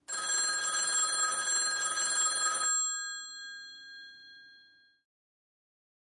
rotary phone ring medium
phone, telephone, ringing, ring, ringer, rotary